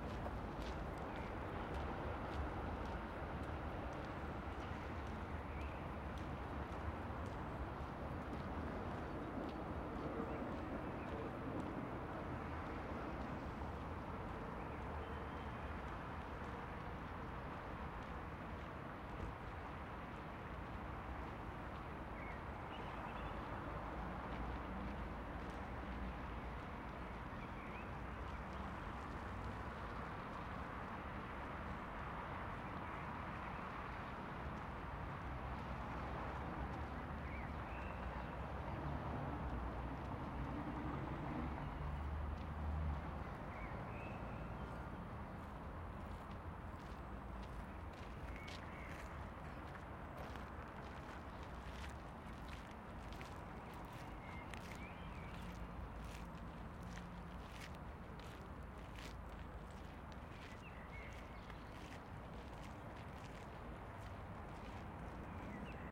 Spring ambience, Helsinki Finland. Recorded with mkh60 onto a zoom h4n.